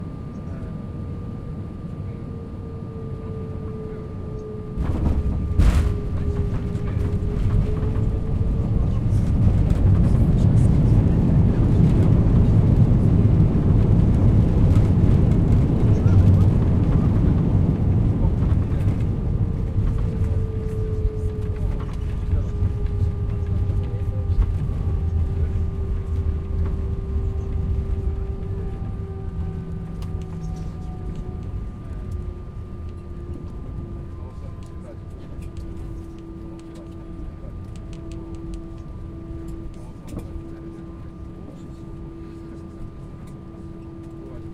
LandingDomodedovo-Short
Atmosphere in the plane. Landing in the Domodedovo. Short version.
Date: 2016.03.07
Recorder: Tascam DR-40